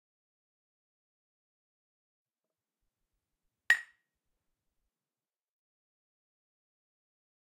Tapping with two glasses of beer. Take .